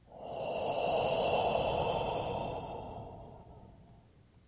breathe out (6)
A single breath out
Recorded with AKG condenser microphone M-Audio Delta AP
human, air, breathing, breath